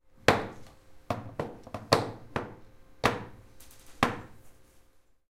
mySound GPSUK Wooden-object
Galliard, Primary, School, UK, wooden